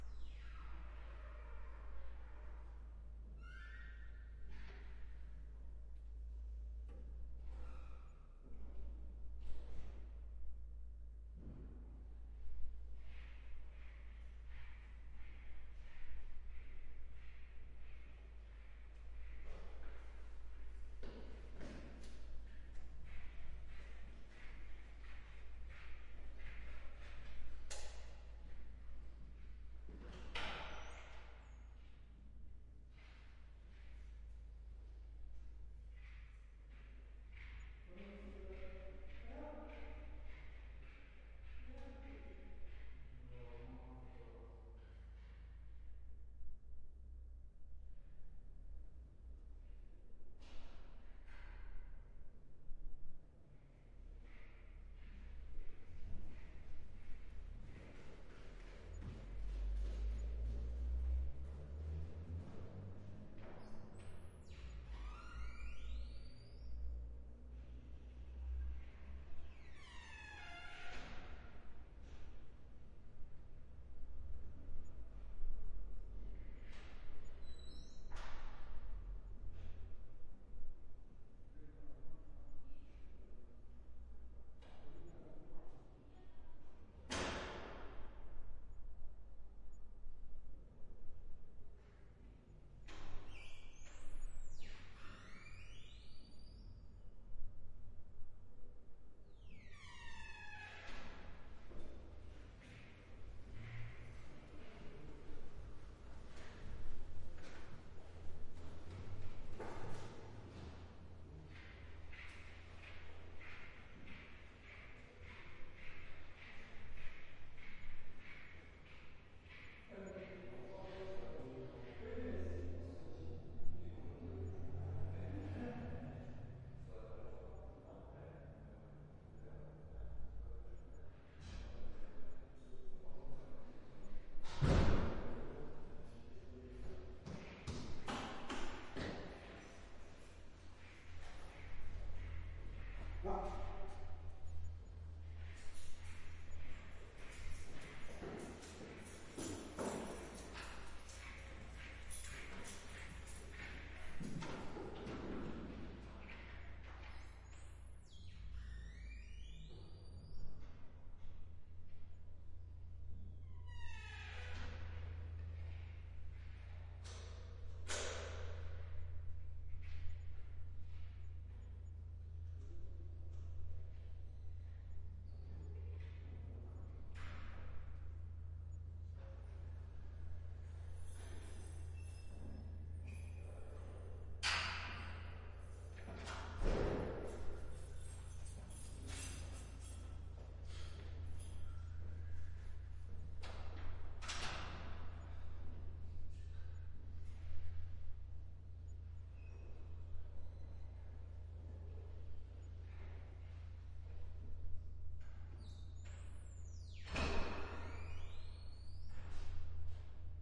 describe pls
Immeuble Hall Portes Voix
Hallway, distant french voices and door closing.
hallway, hall, building, people, France, voices, french